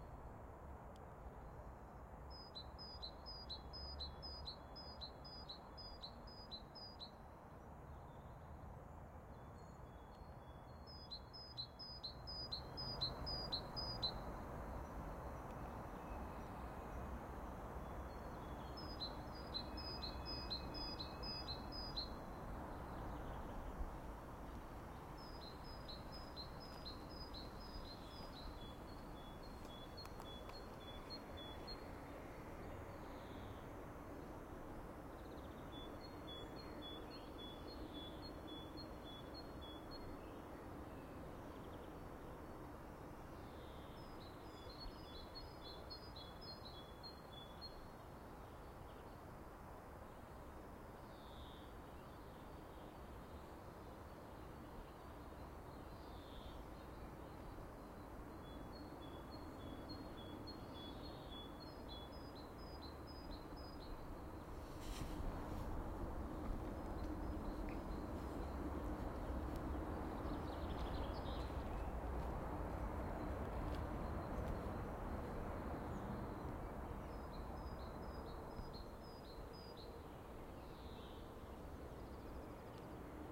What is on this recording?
Springbirds 2 mono
Birds singing. Spring. Distant traffic.
spring-ambience, spring, birds